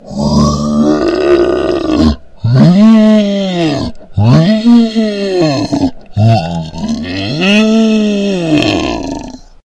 The throaty sound of a creature! It's how she got my voice after applying some filters.
horror, creature, sci-fi